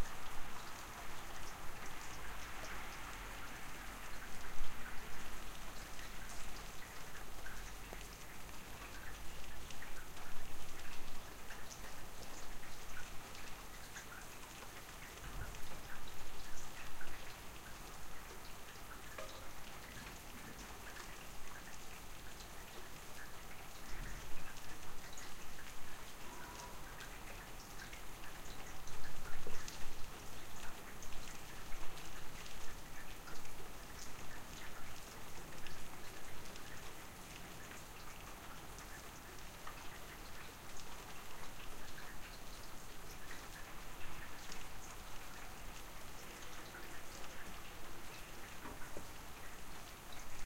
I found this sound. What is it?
fantastic rain
fantastic , rain , Night
Fanatic, Night, rain